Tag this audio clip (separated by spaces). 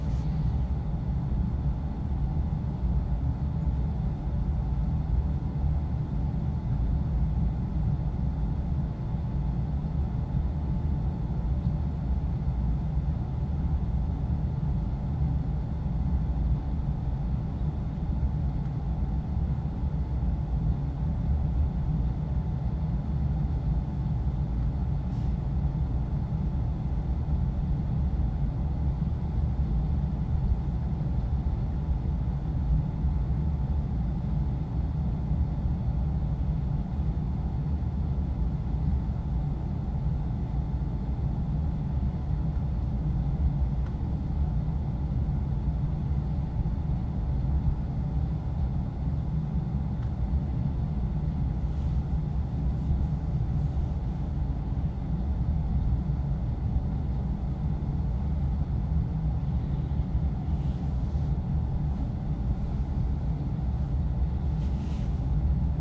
sound design